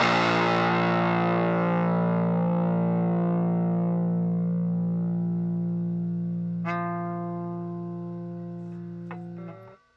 Two octaves of guitar power chords from an Orange MicroCrush miniature guitar amp. There are two takes for each octave's chord.
guitar, miniamp, amp, chords, distortion, power-chords